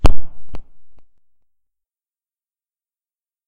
A distant gunshot SFX created by heavily, heavily editing me thwacking my microphone, in Audacity.